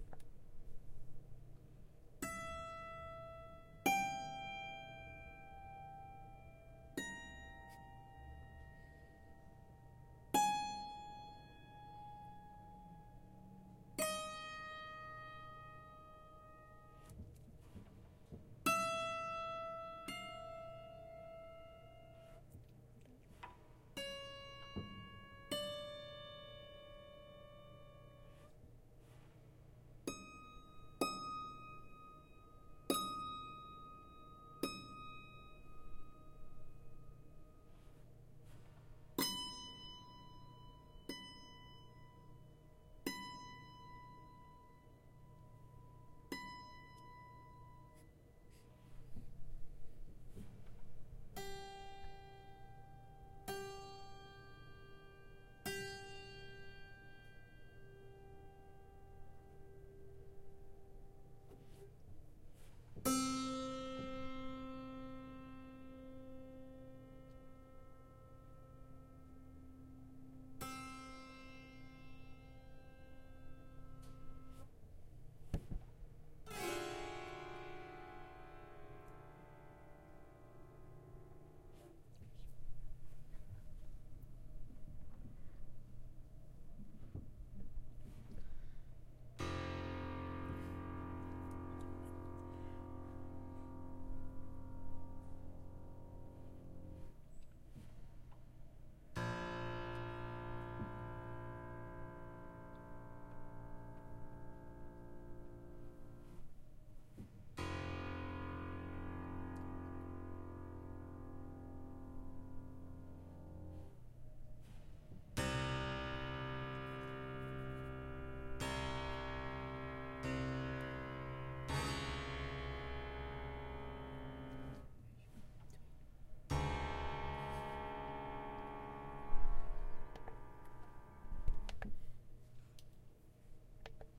Grand Piano Inside
Hitting the strings inside a grand piano with a hard plastic mallet. Played with sweeps and single hits that are stopped or left to ring out.
dissonant, grand, hits, inside, piano, pitched, strings, sweeps